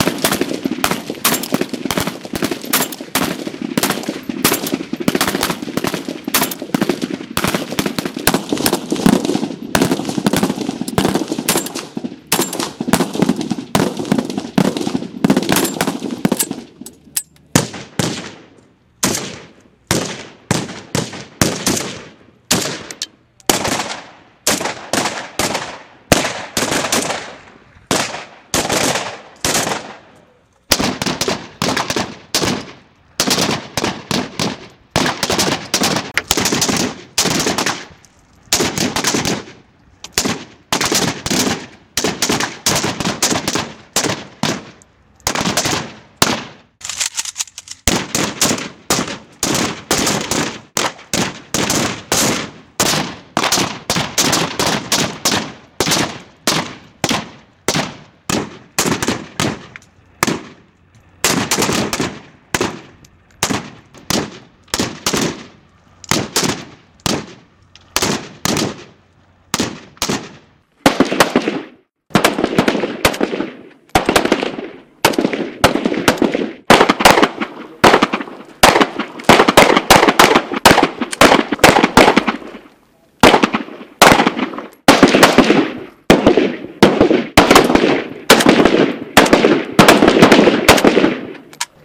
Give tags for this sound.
pistol
targeting
simulation
bullet
automatic
precise
sniper
russian-weapon
ak-47
gun
rifle
shooter
machine-gun
ak47
soldier
black-powder
army
burst
kalashnikov
hunter
kalashnykov
weapon
precision
military
hunt
weapons

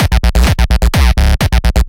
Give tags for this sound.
Beat
Drum
EDM
Loop
Techno

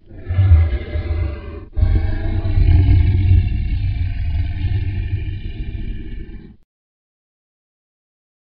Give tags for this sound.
scary
beasts
growls
noises
creature
horror
creatures
beast
creepy
growl
monster

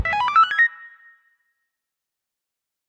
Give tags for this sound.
Arcade
Level
Life

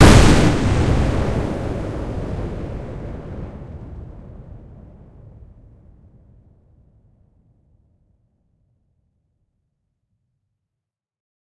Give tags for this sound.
blast
bomb
fireball
good
synthetic

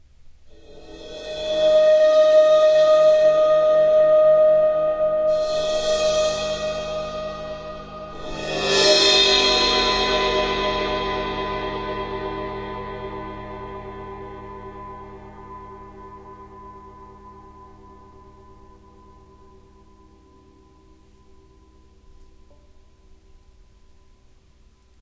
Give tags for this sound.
ambiance
ambient
overtones
atmosphere
bowed-cymbal
soundscape

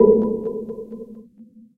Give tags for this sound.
ambient
button
click
hi-tech
press
sci-fi
short
switch
synthetic

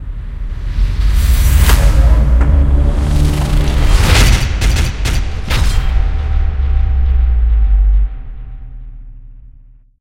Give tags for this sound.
action
aggressive
cinematic
creepy
dark
digital
distorted
drums
effects
energetic
epic
extreme
film
futuristic
games
glitch
horror
hybrid
ident
intense
intro
logo
movie
powerful
teaser
title
trailer

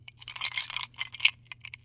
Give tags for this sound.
drink
glass
ice
bar
alcohol
ice-cube
cocktail

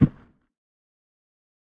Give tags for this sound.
foot
land
man

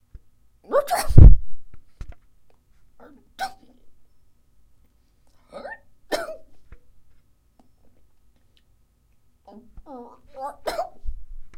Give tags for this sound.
sound
cutest
known-to-man

